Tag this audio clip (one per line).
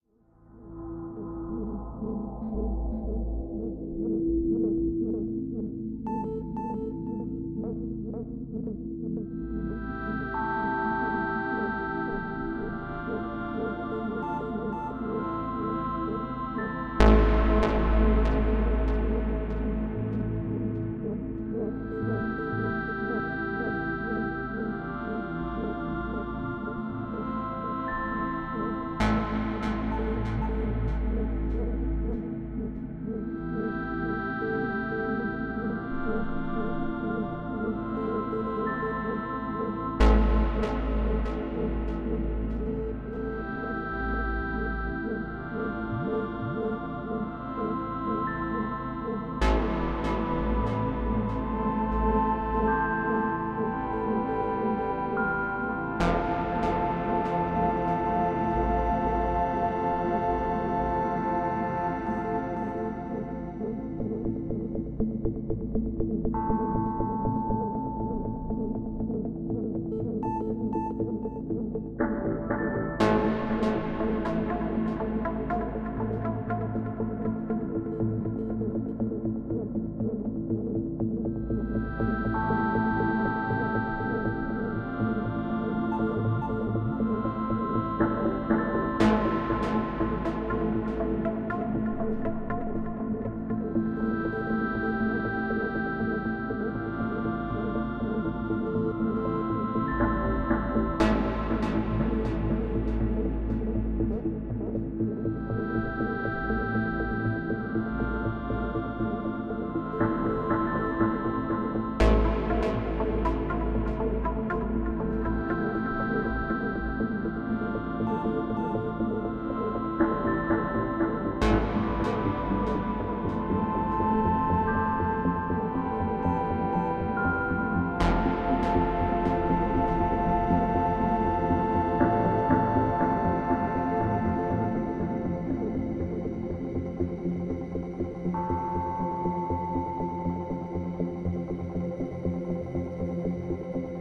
newage
electronic
electro
analog
sound-effect
phat
track
elektro
game
soundtrack
fx
noise
processed
filter
soundesign
synth
music